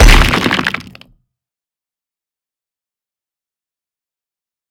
Rock destroy
A sound of a rock breaking appart
break, crack, explode, rock